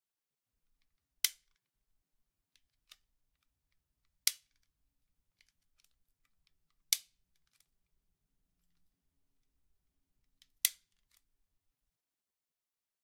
Clicking a stapler with a pause in between each click.